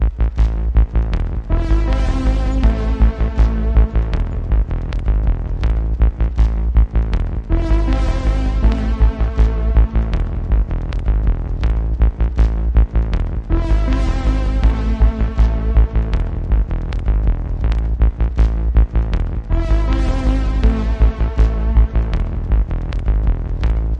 Reverb Bass HipHop loooop..
160bpm